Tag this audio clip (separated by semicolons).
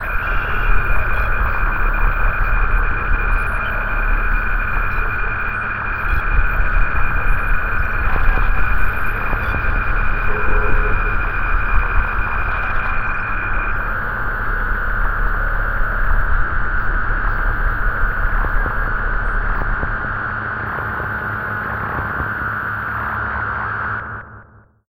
ambience; ambient; claustrophobic; cold; communication; cosmic; drone; field-recording; hyperdrive; hyperspace; industrial; interior; interstellar; radio; sci-fi; soundscape; space; spaceship; transmission; vessel